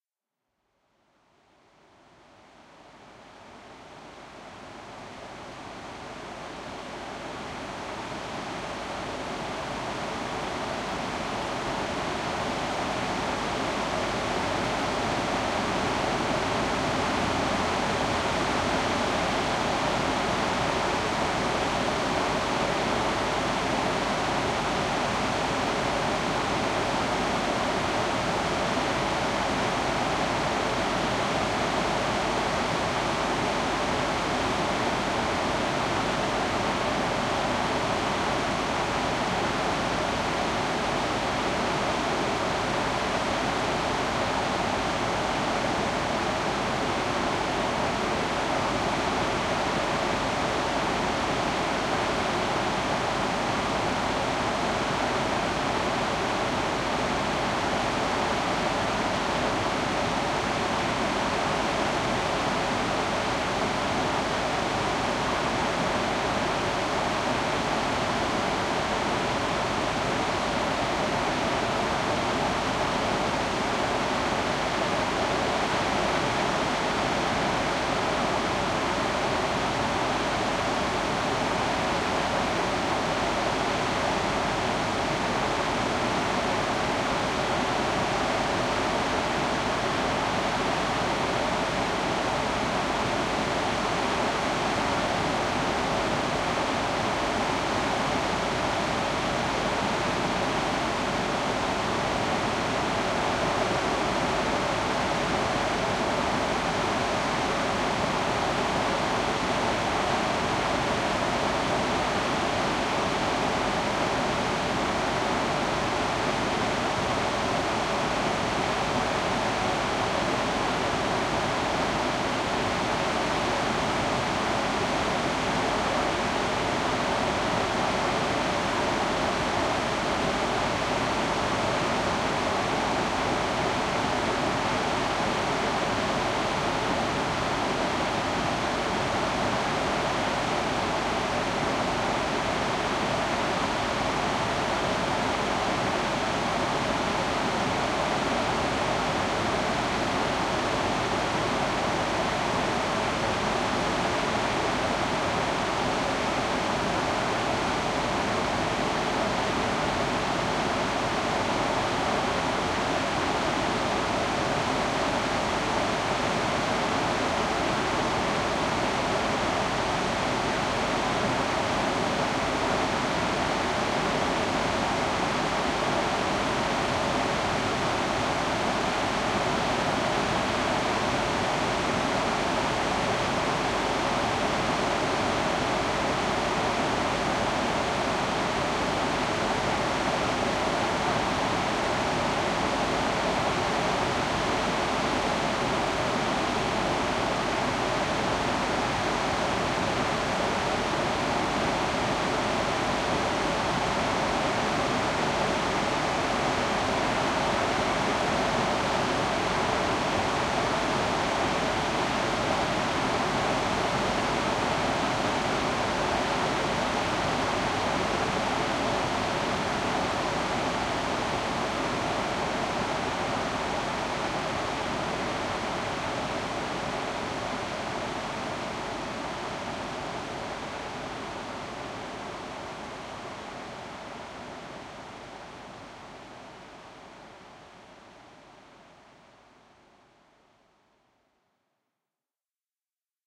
Strong Waterfall Norway RF
recorder edirol r09, little eq, strong almost static waterfall